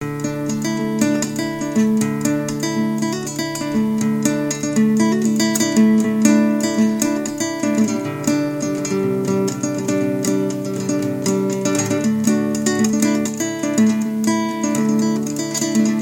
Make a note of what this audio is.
WAITING Guitar
A collection of samples/loops intended for personal and commercial music production. For use
All compositions where written and performed by
Chris S. Bacon on Home Sick Recordings. Take things, shake things, make things.
acapella, acoustic-guitar, bass, beat, drum-beat, drums, Folk, free, guitar, harmony, indie, Indie-folk, loop, looping, loops, melody, original-music, percussion, piano, rock, samples, sounds, synth, vocal-loops, voice, whistle